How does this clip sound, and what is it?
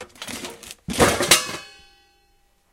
Moving objects and crash
chaotic, clatter, crash, objects